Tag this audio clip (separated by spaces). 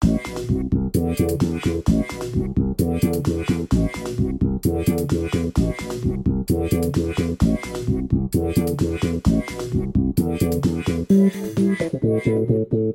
electronic-music vlog music vlogging-music vlog-music audio-library prism download-music free-music vlogger-music free-music-download download-background-music free-music-to-use sbt background-music syntheticbiocybertechnology